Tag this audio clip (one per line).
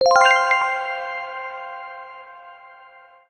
accomplished
energy
finished
game
positive
win